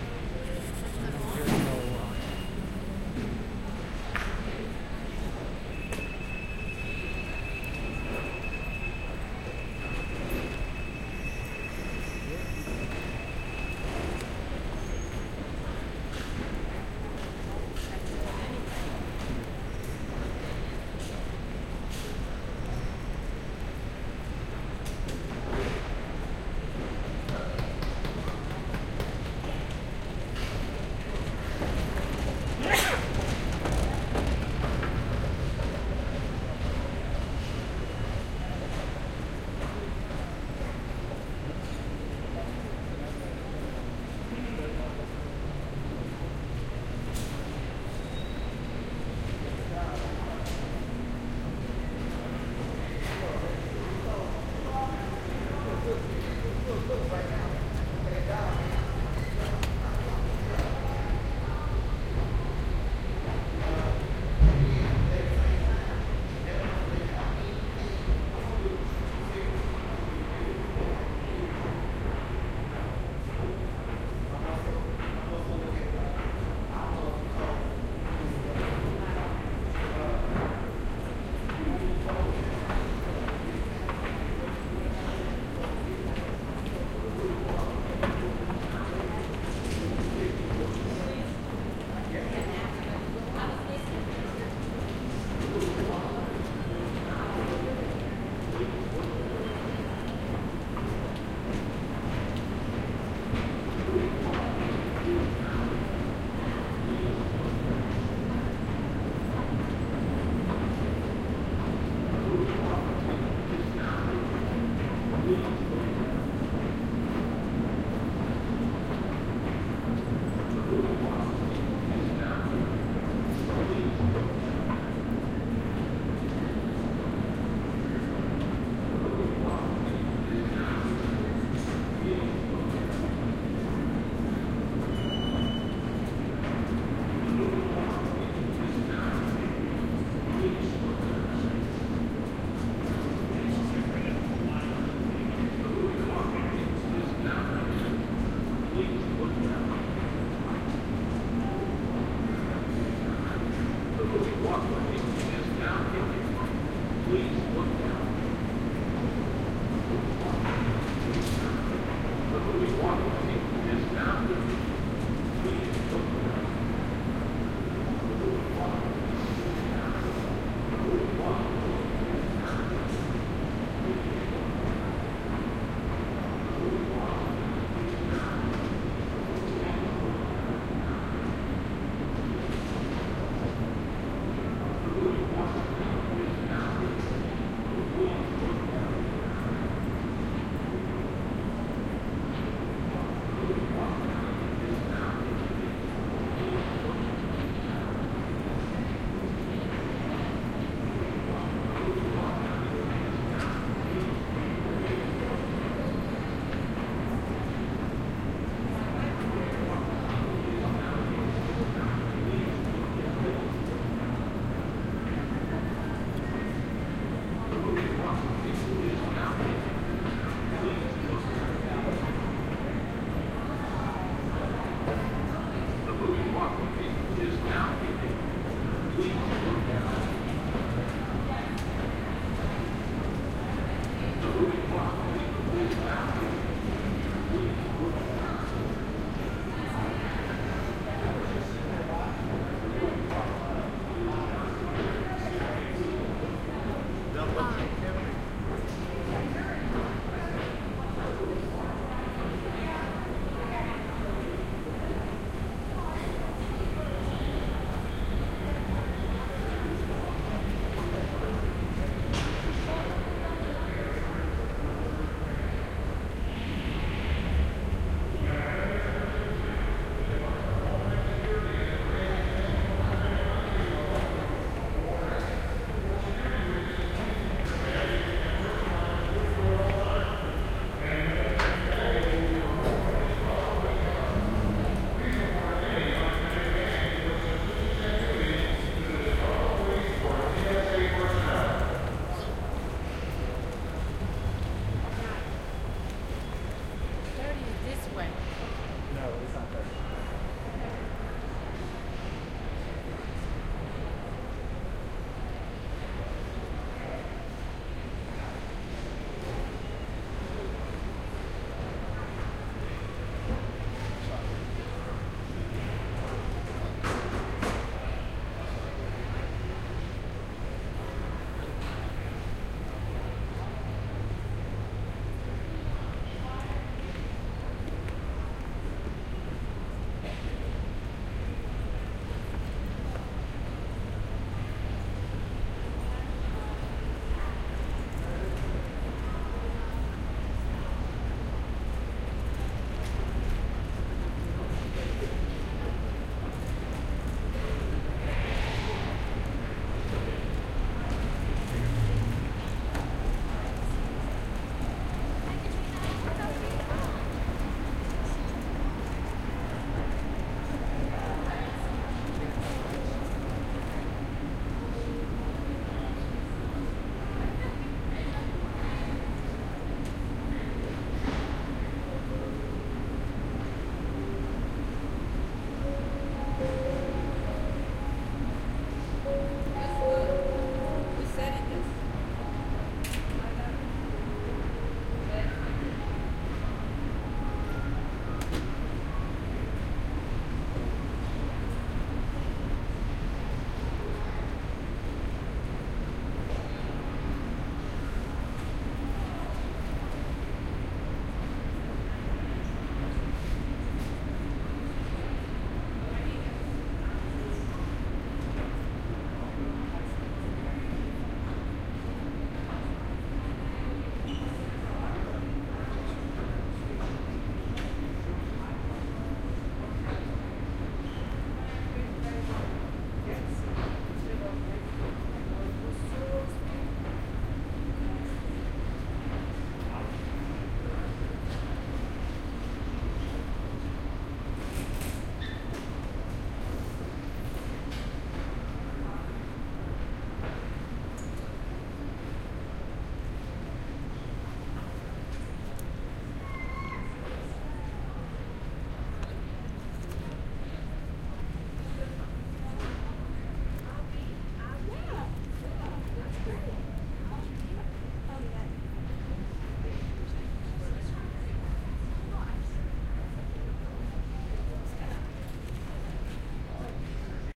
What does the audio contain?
Walking through Chicago O'hare airport going from C concourse to B concourse via an underground tunnel that plays music.Recorded with Sound Professional in-ear binaural mics into Zoom H4.
airport, binaural, chicago, field-recording, indoors, inside, ohare, people, phonography, walking